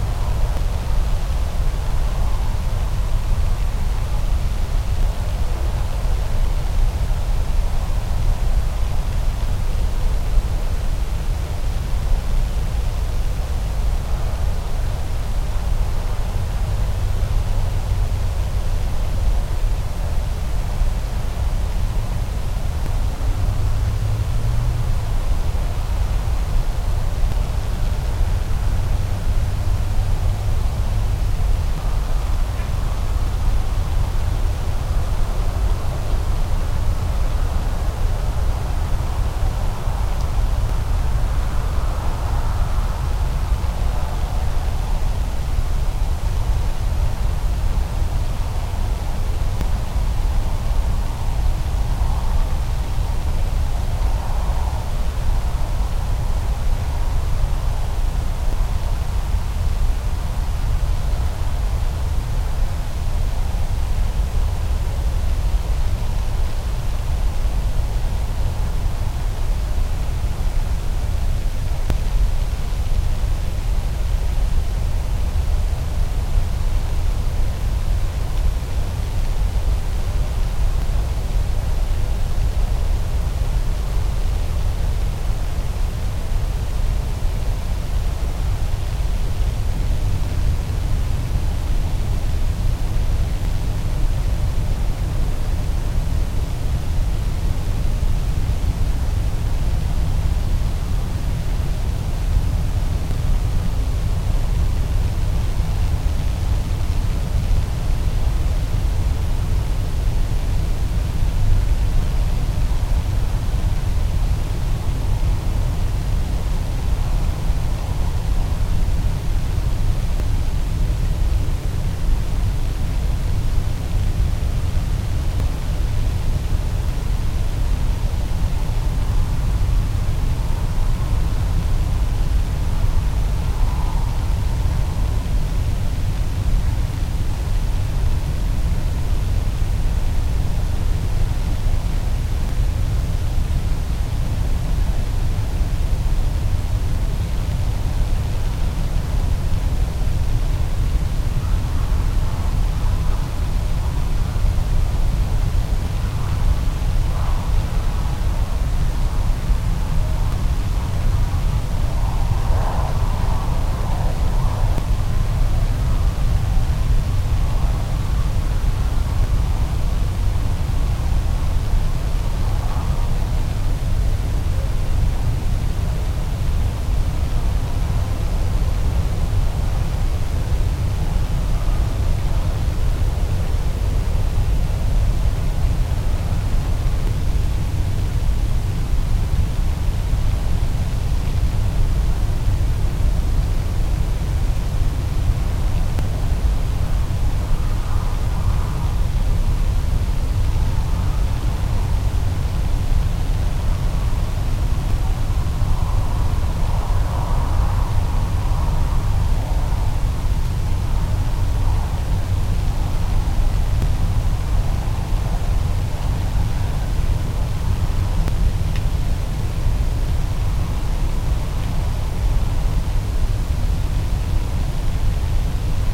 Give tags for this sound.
field; humidity; recording; wind; field-recording; fieldrecording; electric; raw; cables; wire; windy; humid; electricity; wires; cable; outside; crackle; sparkle

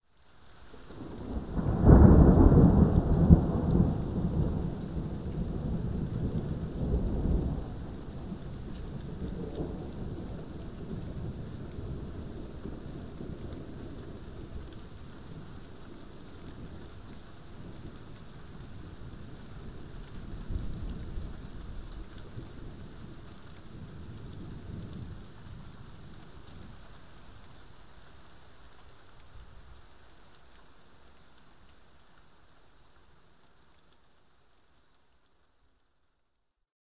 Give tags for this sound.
field-recording; thunderstorm; weather; lightning; thunder; storm

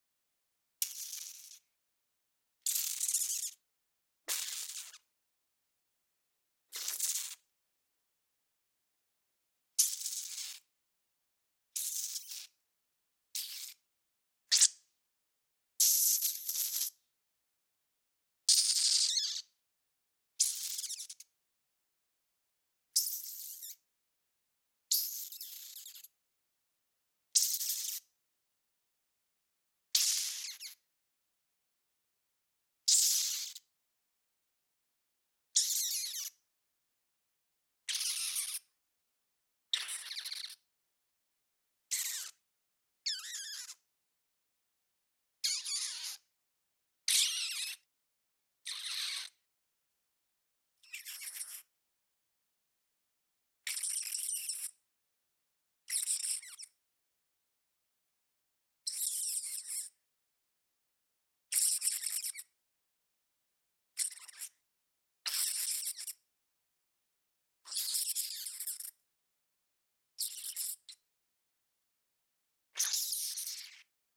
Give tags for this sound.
spider; game-foley; rpg; rat; animal; bat; screech

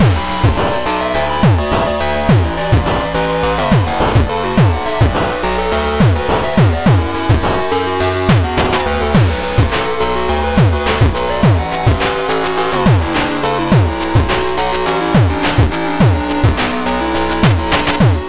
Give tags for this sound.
105bpm; 8bit; B; figure; minor; random; rock

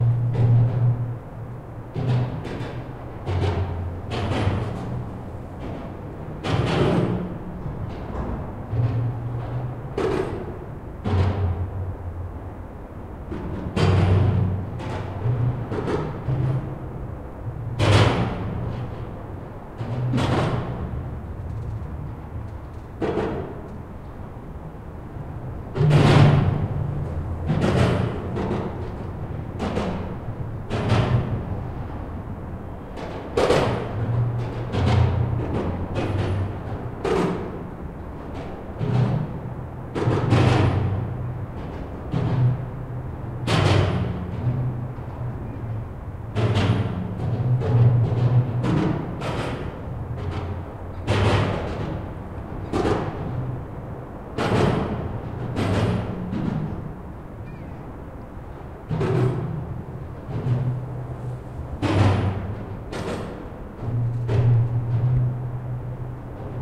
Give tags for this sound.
Russia; bridge

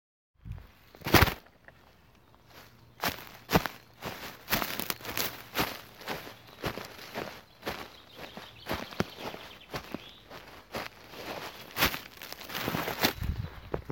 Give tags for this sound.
sound-effect; leaves; Field-recording; walking; forrest; game; leaf; trees

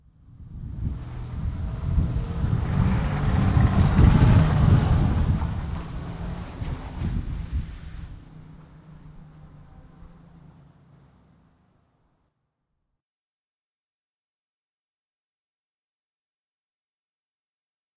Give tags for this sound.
driving; doppler; drive-by; car

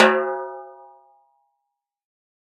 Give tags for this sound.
1-shot; velocity; drum

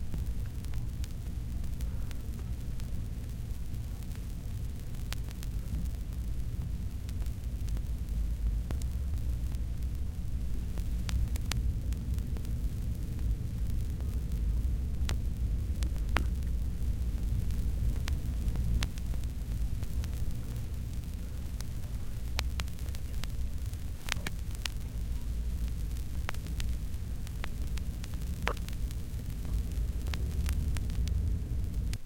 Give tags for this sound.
phonograph; retro; turntable; record; surface-noise; vintage; album; vinyl; LP; crackle

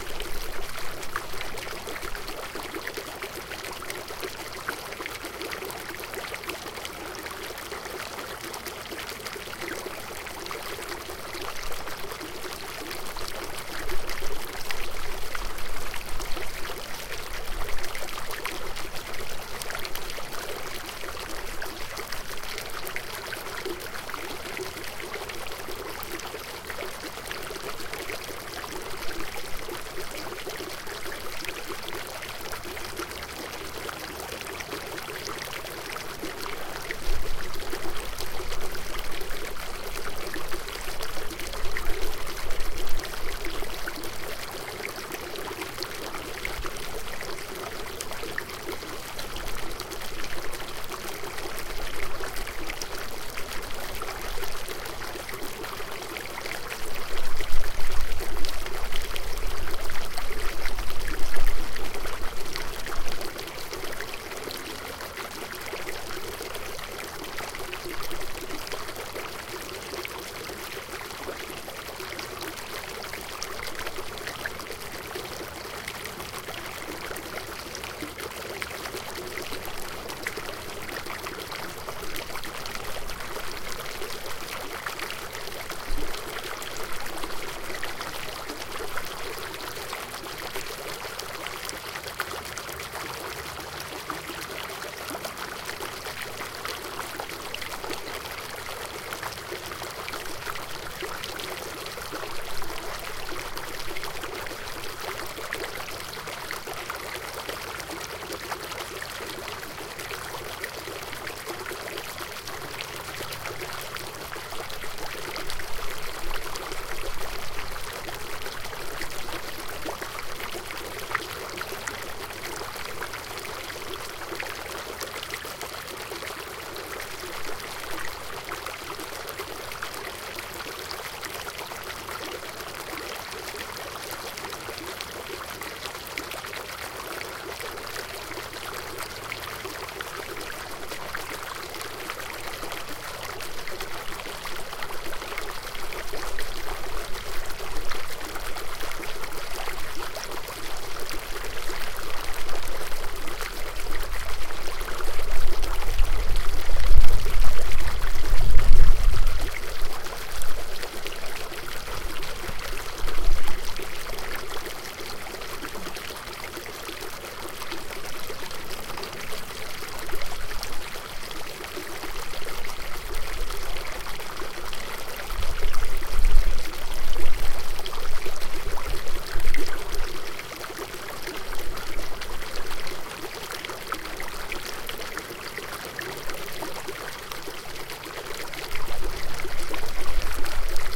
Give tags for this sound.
field-recording; fountain; water

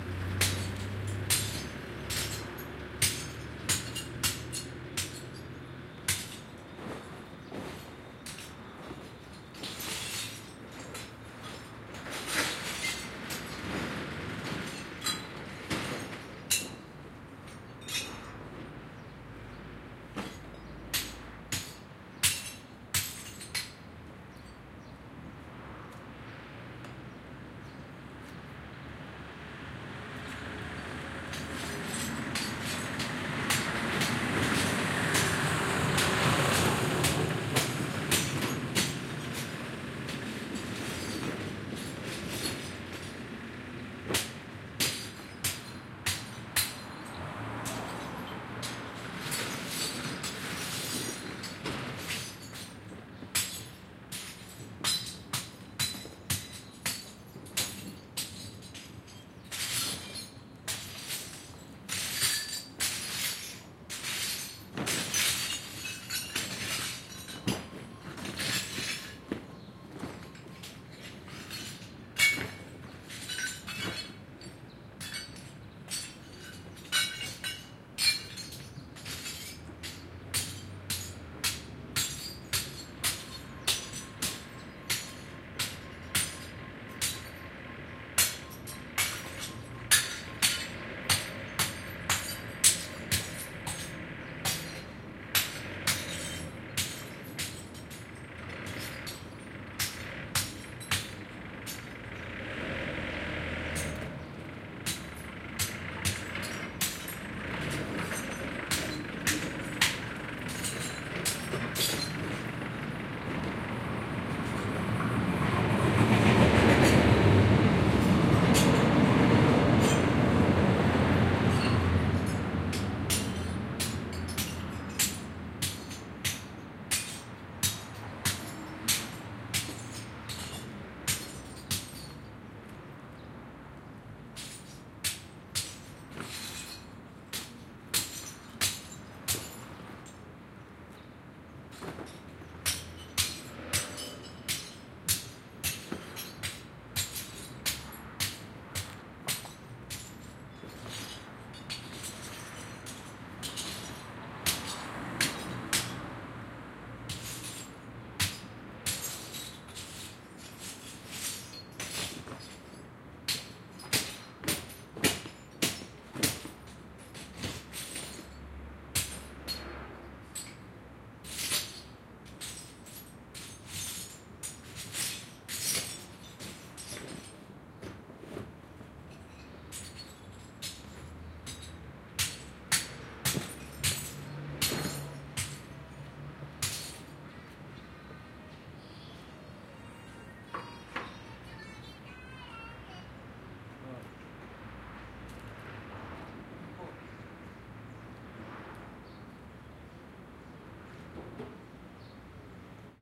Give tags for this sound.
Field-recording; Glass; binaural-recording; breaking; train; work

